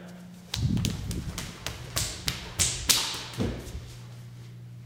barefoot hall running
running, footsteps, barefoot